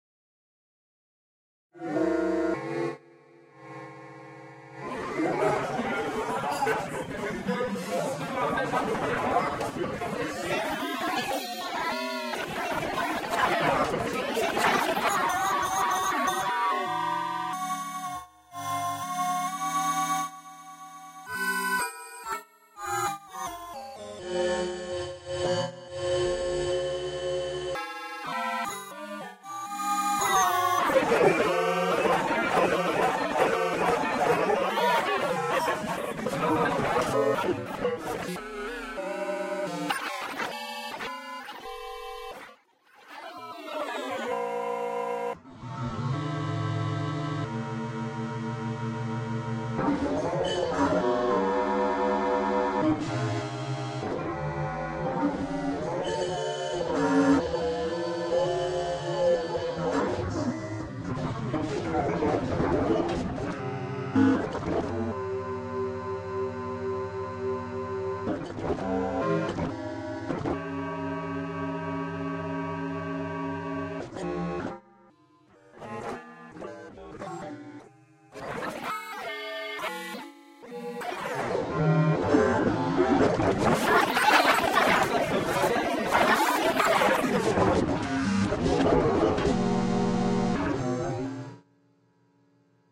A wacom-tablet live improvisation of a spectral-analysis of a talking crowd